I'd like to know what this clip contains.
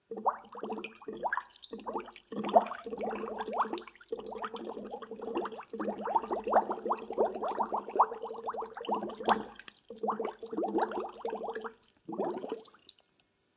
Water Bubbles 01
bubble, bubbles, liquid, splashing